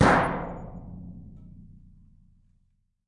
Plat mŽtallique gong f 3
household, perc